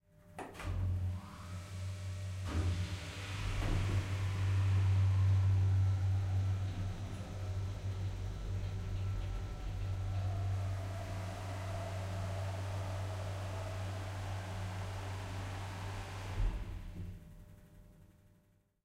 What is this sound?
elevator travel 6c
The sound of travelling in a typical elevator. Recorded at the Queensland Conservatorium with the Zoom H6 XY module.
elevator, lift, mechanical, moving, travelling